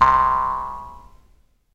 A single Jew's harp hit, right around C.
boing, harp, jaw, jews, spring